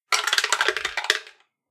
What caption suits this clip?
Dice Tower Roll - Percentile Dice
Using a dice tower to roll percentile dice, popular with TTRPG games like Call of Cthulhu or Delta Green. A very satisfying clattering sound.
Gambling, Dice, TTRPG, Click-Clack, Roll